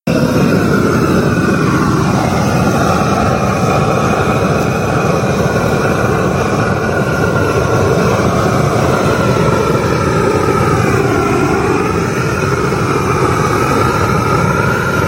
A large gas weed torch burning for several seconds. Could make a good flamethrower sound.
Recorded: August 2014, with Android Voice Recorder (mono), outdoors/backyard in the afternoon.